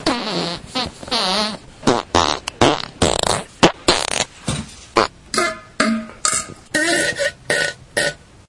fart montage 4
A plethora of poots.
aliens, fart, gas, flatulation, flatulence, laser, poot, noise, explosion, computer